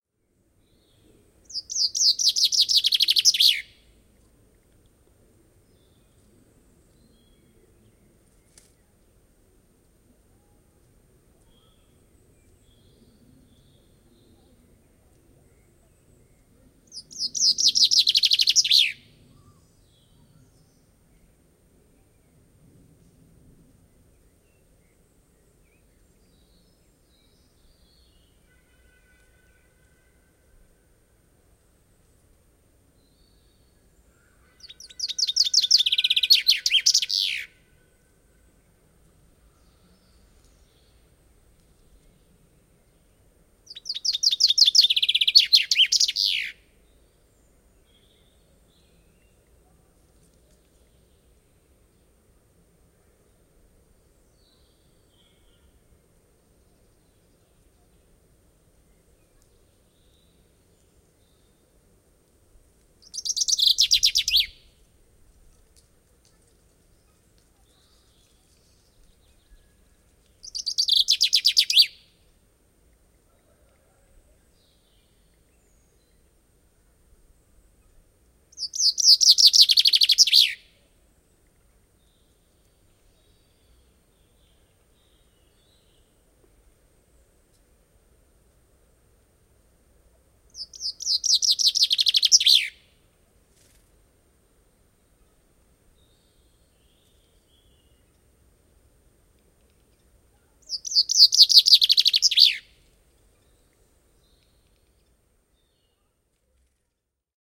chaffinch black forest
Chaffinch singing at dusk in the forest of Baiersbronn, Black Forest, in southern Germany. Vivanco EM35 on parabolic dish with preamp into Marantz PMD 671.
dusk, fringilla-coelebs, forest